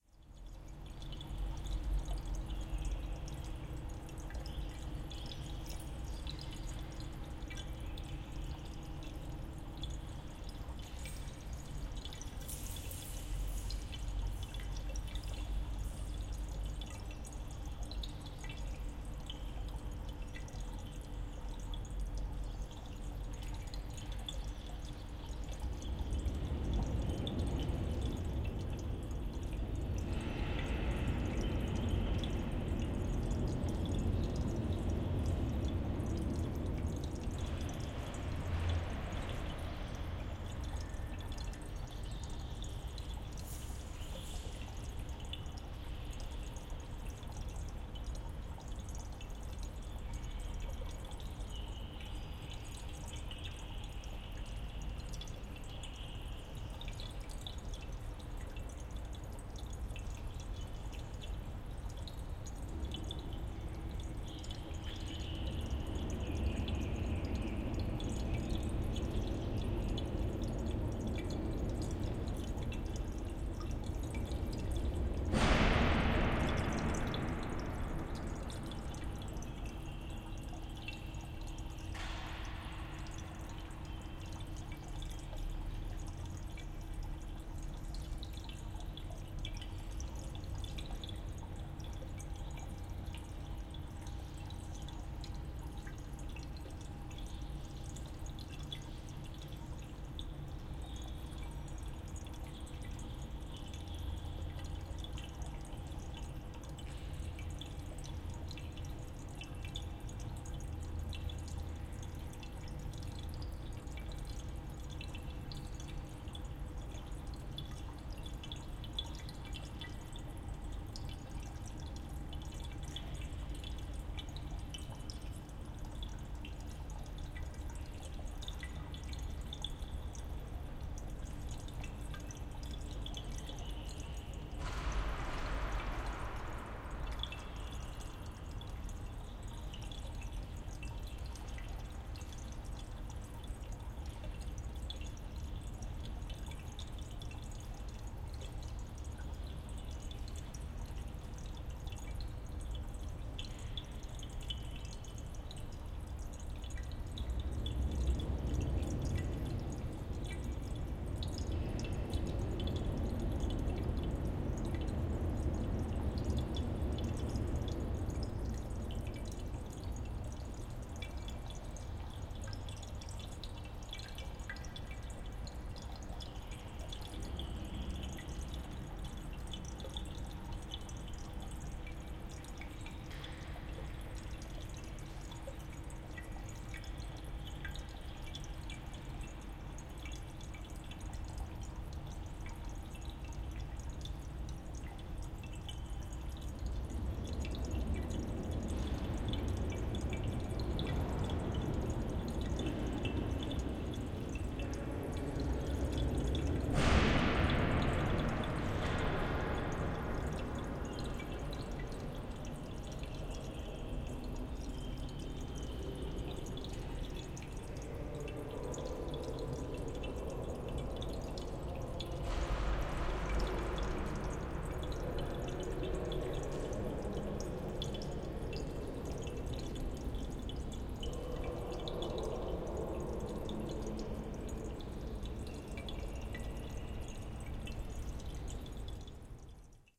Berlin Krematorium Brunnen sound file Udo Noll März 2012
Udo Noll is an artist living in Berlin. His sound file gives an image of a crematory he visited close to his home in Berlin Neukölln.